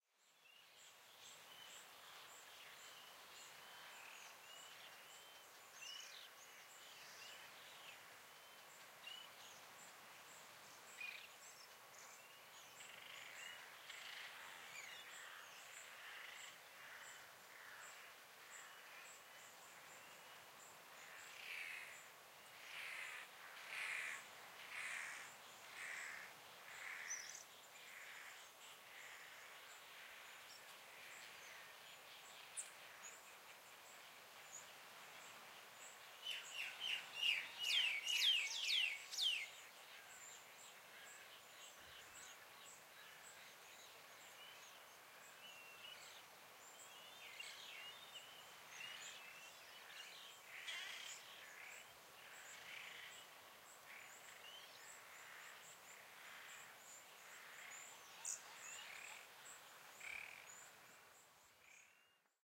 Birds Ambience Soft
Seabirds on Bird Island, Seychelles
Nature, Peaceful, Birds, Forest, Environment, Birdsong, Seabirds, Seychelles, Field-recording, Island